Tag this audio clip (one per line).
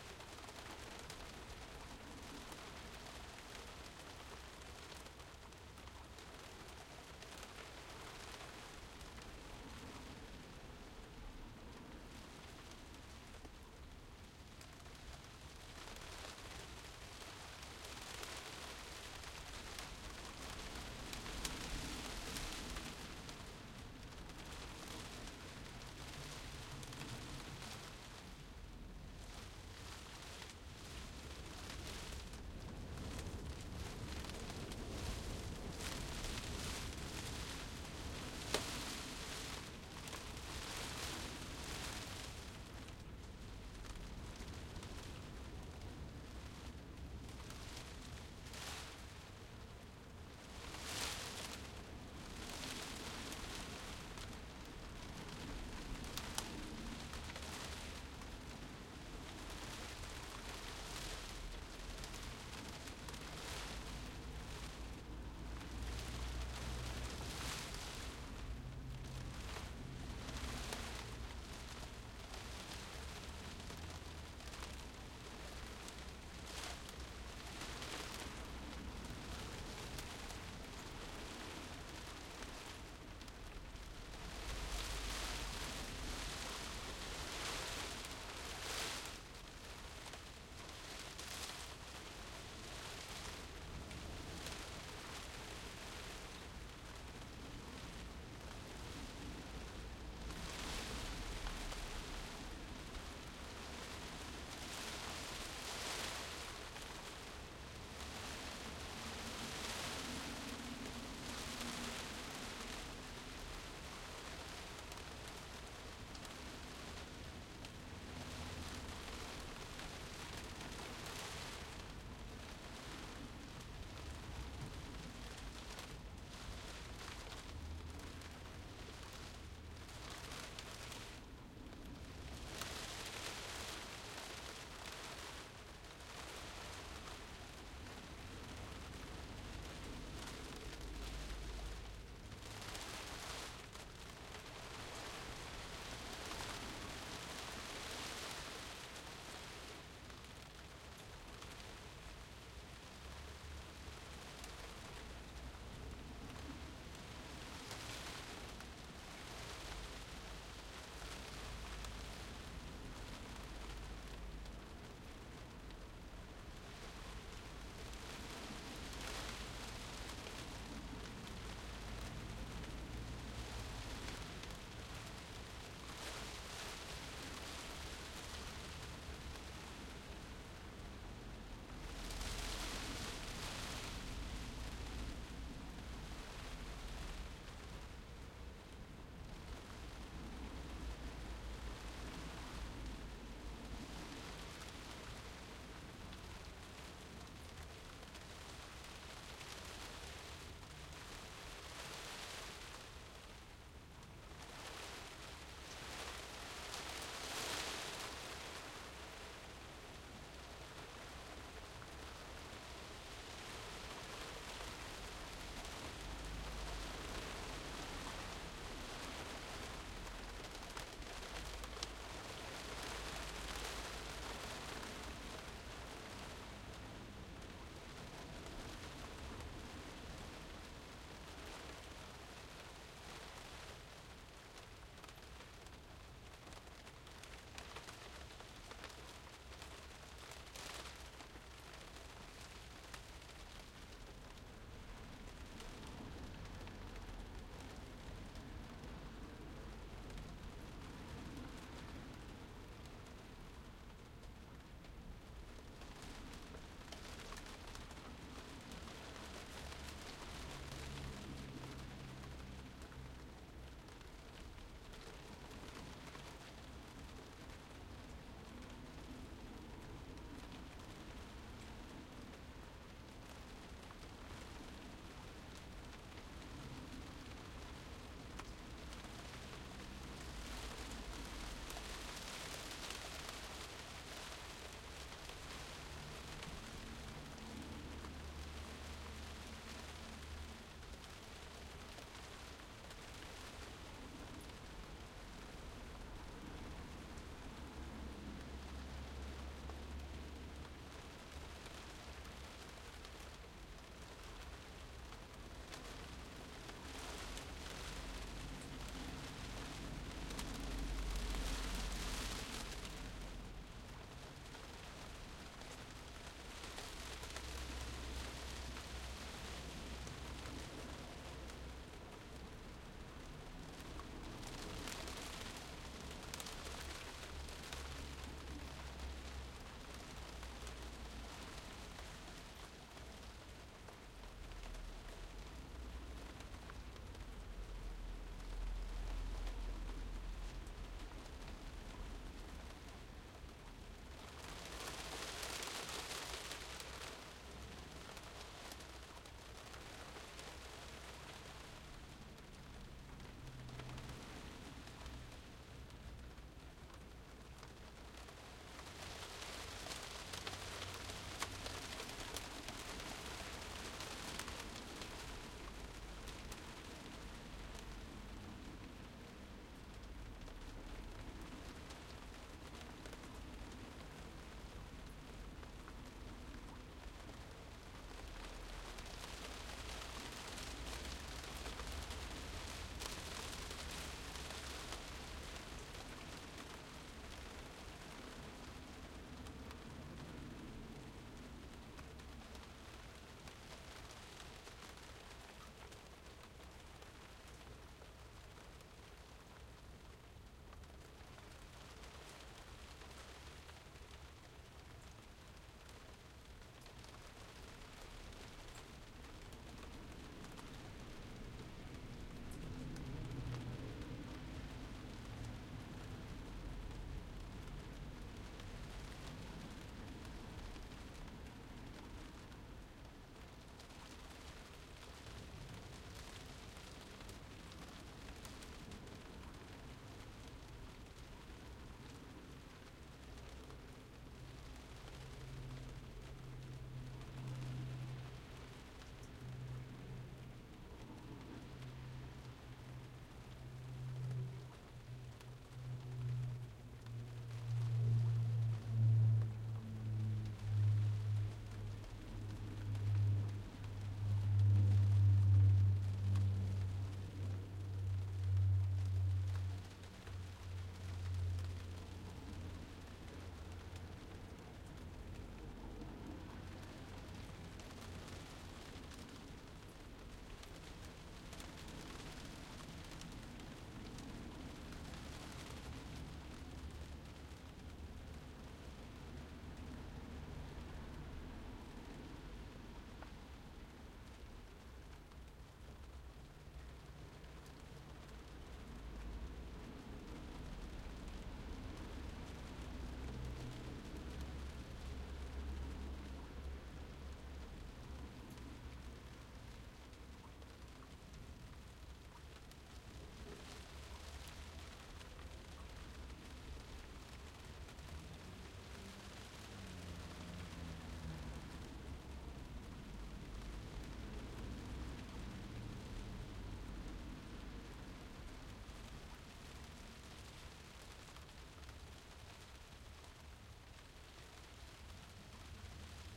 attic
rain
wind
windy
roof
tile
soft
gentle